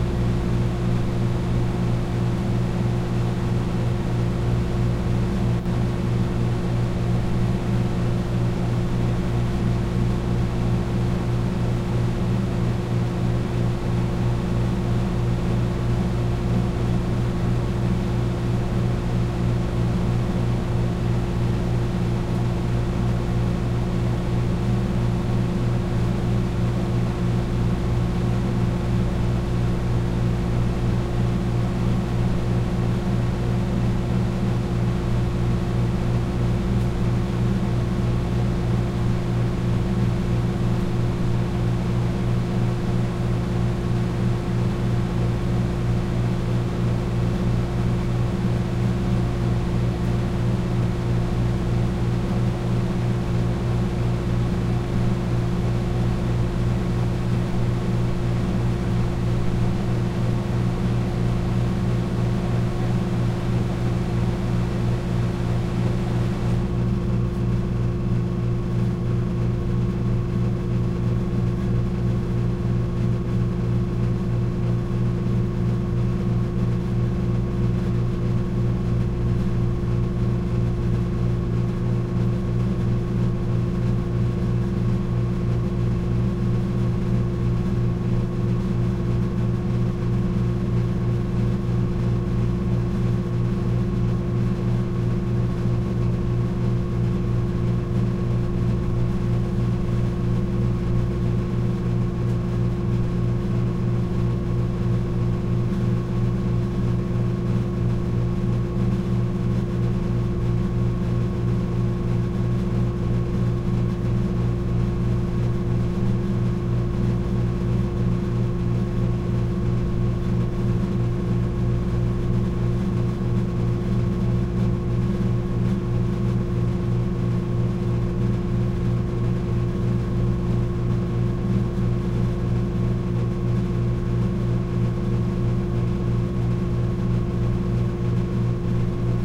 compressor soft vending machine nearby in hall +intensity change
compressor, nearby, vending